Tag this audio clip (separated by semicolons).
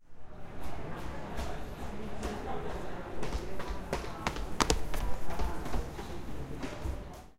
shoe; UPF-CS14; foot-steps; campus-upf; downstairs